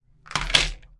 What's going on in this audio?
objects on table